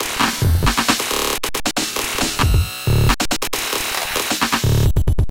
Glitch Drums 004
Drums, Glitch, Loop, Sample